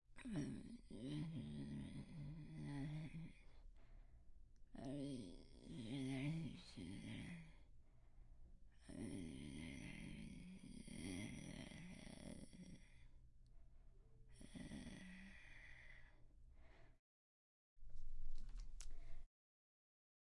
11-Babbling woman Asleep
Babbling womanan Asleep
Babbling, Breath, Man